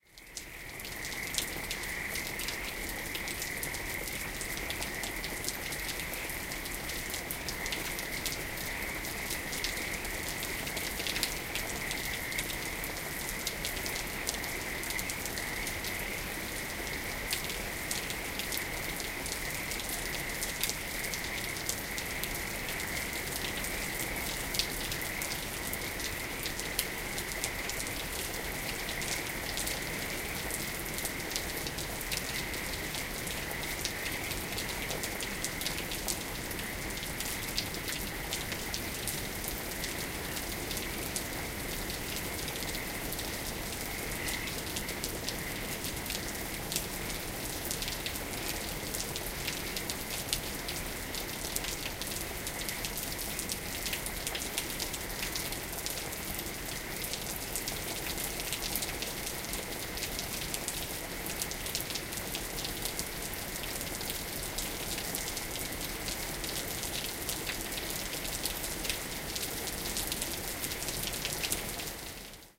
Rain and frogs 2
Frogs sing in the pond in the spring rain. Recorded with paired omni mics sitting on the windowsill.